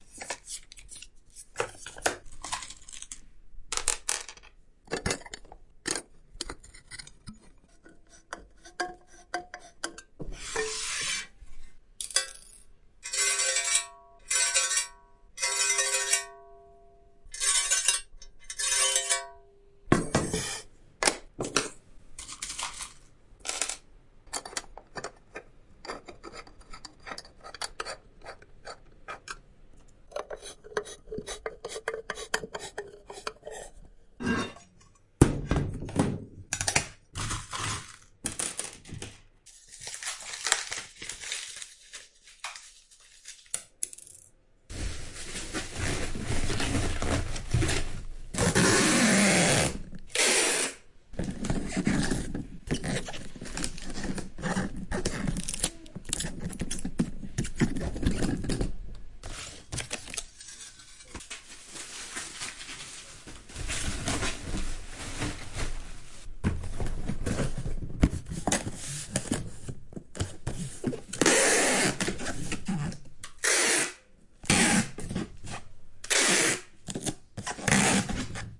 PS Skiff Building
Created and formatted for use in the Make Noise Morphagene by Peter Speer.
Sounds of powered skiff construction from the production line at the Make Noise shop.
assembly-line, skiff-building, makenoisecrew, peterspeer, manufacturing, mgreel, morphagene